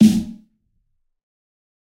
fat snare of god 022
This is a realistic snare I've made mixing various sounds. This time it sounds fatter
drum, fat, kit, realistic, snare